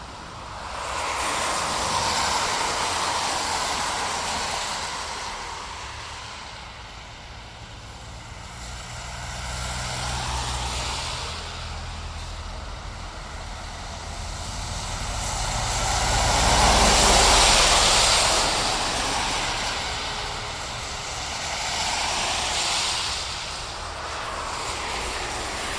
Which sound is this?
Cars Passing
Cars recorded using a digital recorder.